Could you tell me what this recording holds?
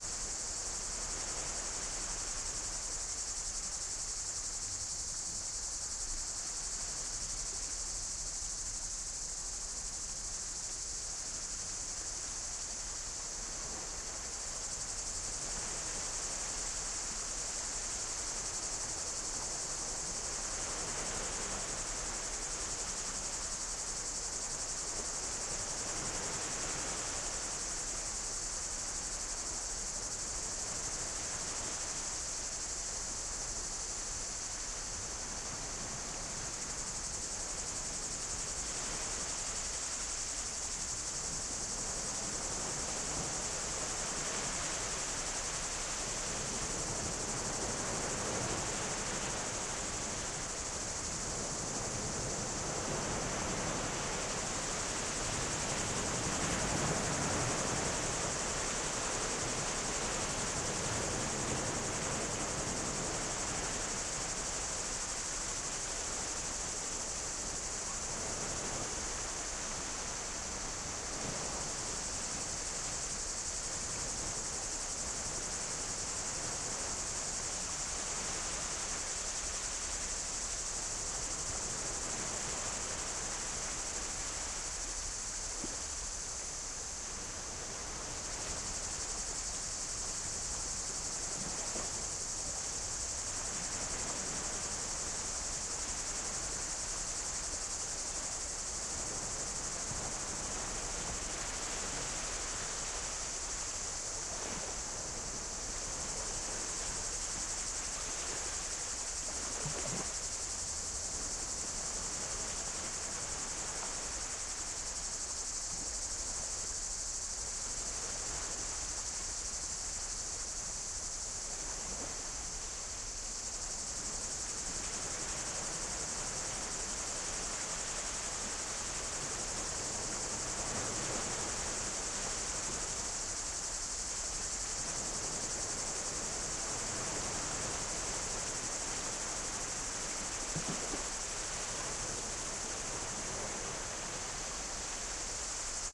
BGSaSc Greece Waves Distant Beach Crickets Cicades 03
Waves Distant Beach Crickets Cicades Greece 03
Recorded with Km 84 XY to Zoom H6
Ambience, Beach, Cicades, Crickets, Distant, Field-Recording, Greece, Nature, Ocean, Sea, Water, Waves